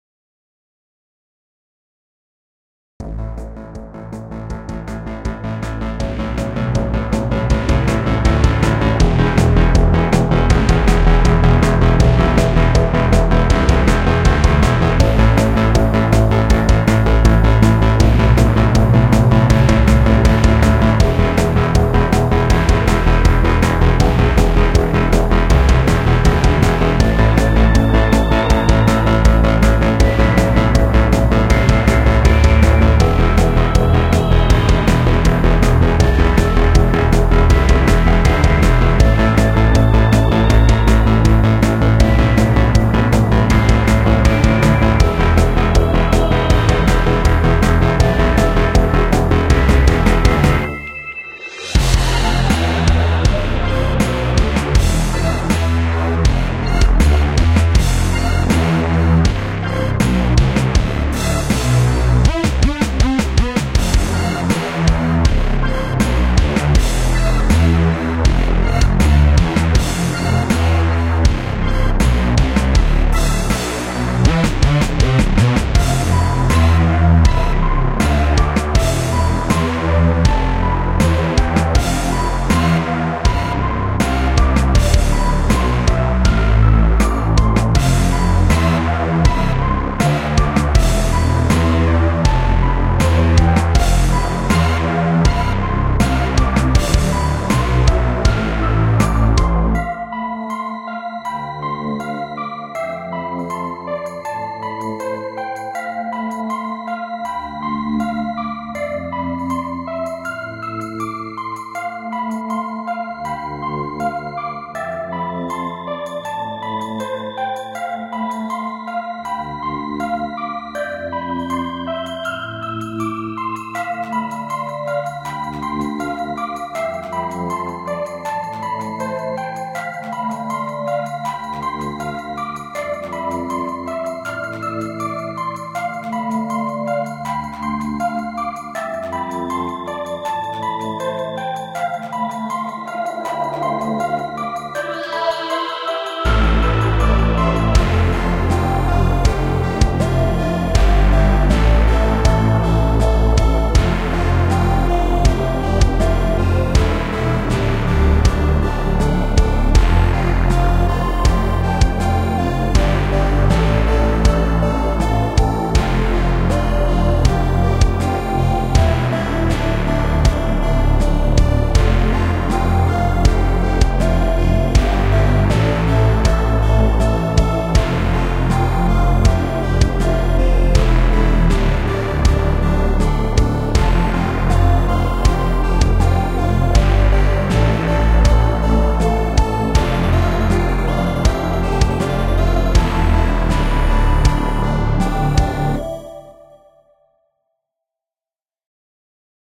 Hello and welcome!
Before creating my game, I've created music.
Unfortunately, it turned out that the created music does not match the atmosphere of the game I'm working on in any way.
If you think that the soundtracks might be useful to you, please use it!
I am 1 dev working on the game called Neither Day nor Night.
Check it out!
(And preferably a link to the Steam or Twitter if possible!)
Enjoy, and have a good day.
#NeitherDaynorNight #ndnn #gamedev #indiedev #indiegame #GameMakerStudio2 #adventure #platformer #action #puzzle #games #gaming